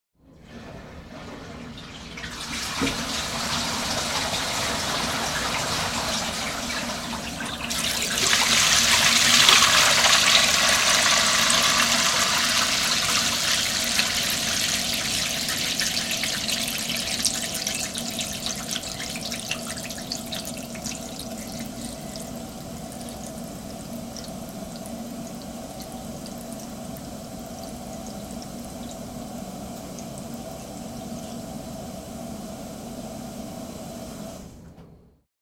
Mono track recorded with a Rode NT1. The toilet upstairs was flushed and the pipes were close-miked to hear the water rush through.